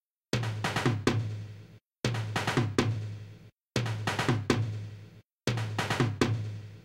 140 bpm drum loop fill
140 bpm drum fill loop
140-bpm, loop